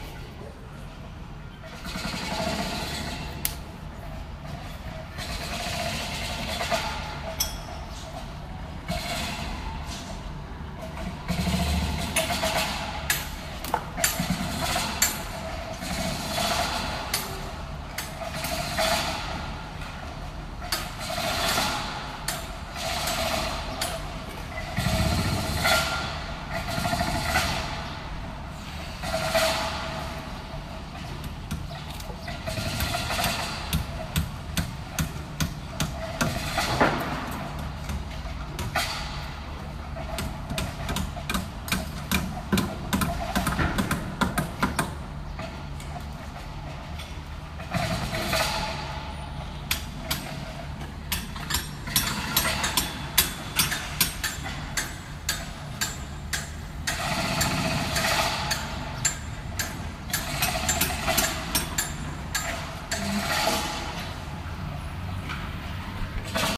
Construction sounds
There are multiple sounds recorded in a construction side.